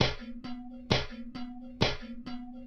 Live Loop 006a 90bpm
Sample of one of the sections where me and Joana played together. I choose one of the 'best played' parts and made it loopable.
For these recordings we setup various empty cookie cans and we played drummers using 2 pens or little sticks.
Recorded with a webmic.
Joana also choose the name for these sessions and aptly called it "The Bing Bang Bong Band"
Recorded with a webmic on 6 Dec 2011.
loop, rhythm, child, percussion, loopable, playing, can